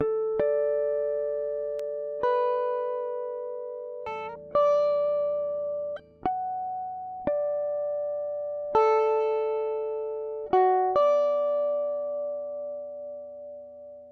guitar harmonics
acid, apstract, classical, funk, fusion, groovie, guitar, harmonics, jazz, jazzy, licks, lines, pattern